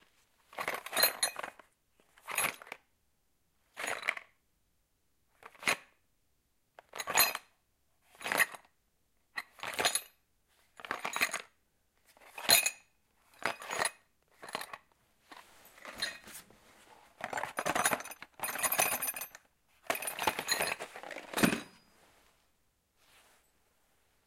Lightmetal armor
Good sound for armor suit
armor, metal, tools